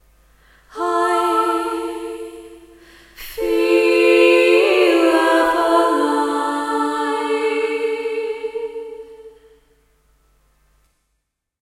A short layered vocal clip from a project I'm working on, wherein I'm singing "I feel half alive".
Recorded in Ardour with the UA4FX interface and the Behringer C3 mic.
Please note, the squeaky noise in the background can not be heard in the downloadable version.